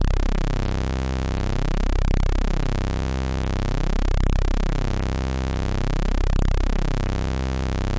Detuned Sawtooths C0
Detuned sawtooths good to make bass sounds